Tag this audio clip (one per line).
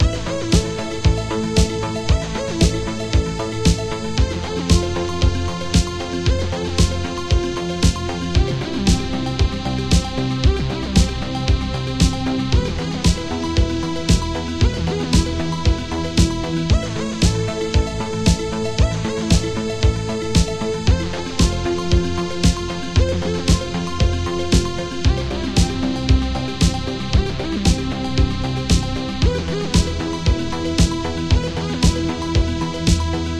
base
bass
beat
clap
dance
disco
drum
electronic
kick
leads
loop
original
pop
snare
synth
techno
Tecno
track